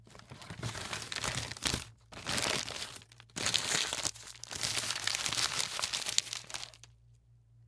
Paper Bag and Bottle Handling FF388
Removing bottle from paper bag, crinkling paper bag